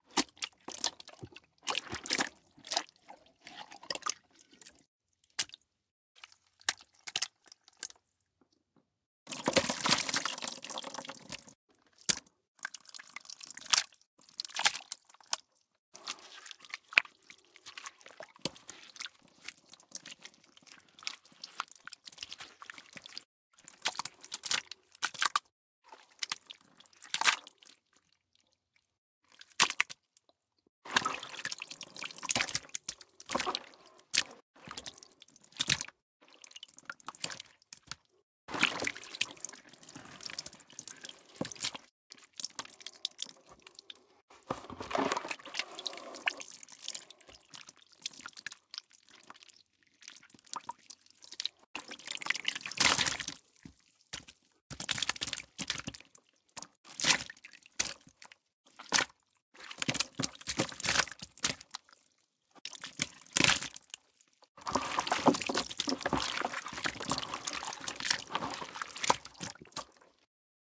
dripping splish splash blood smash flesh murder bone break
blood, dripping, flesh, smash, splash, splish, watery